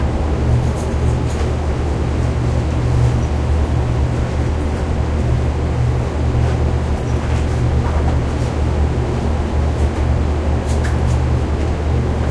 Sounds recorded while creating impulse responses with the DS-40.